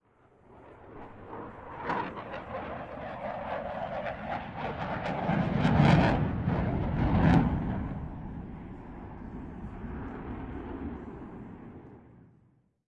Eurofighter Typhoon Flyby 002 – Close Proximity
A recording of a flyby of a Eurofighter Typhoon – a modern jet engine fighter airplane – at an airshow in Berlin, Germany. Recorded at ILA 2022.
Aircraft, Airport, Airshow, Aviation, Engine, Eurofighter, Fighter-Jet, Flight, Flyby, Fuel, Jet, Jet-Engine, Manoeuvre, Plane, Roar, Rocket, Stunt, Typhoon, War